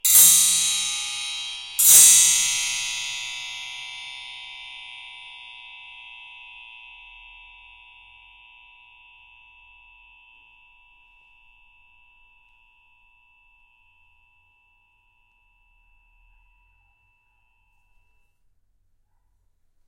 Clang rake double
A metal spring hit with a metal rod, recorded in xy with rode nt-5s on Marantz 661. Hit repeatedly